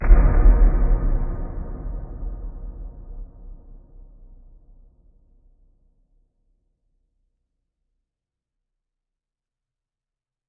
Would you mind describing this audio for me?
Cathedral Reverb JUMPSCARE
This is a JUMPSCARE sound. I made it hitting the desk with my mobile phone, then I added the CATHEDRAL REVERBERATION effect with Audacity.
The mic is Logitech HD Webcam C270.
dark, jumpscare, suspense, creepy, deep, cathedral, horror, scary, fear, terror, sinister, reverberation, spooky